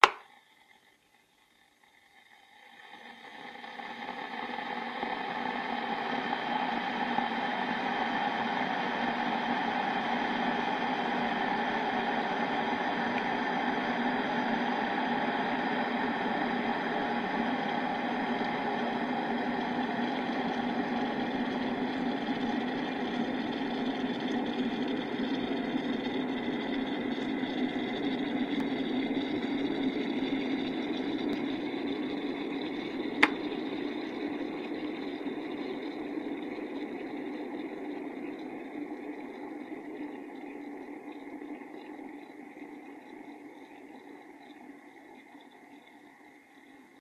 Teapot boiling record20151219011512
Turning on of teapot, boling, then cooling down. Recorded with Jiayu G4 for my film school projects. Location - Russia.
boiling-water, teapot